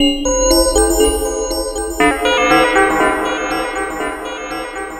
semiq fx 10
abstract, delay, digital, effect, efx, electric, electronic, future, fx, glitch, lo-fi, noise, sci-fi, sfx, sound, sound-design, sound-effect, soundeffect, strange, weird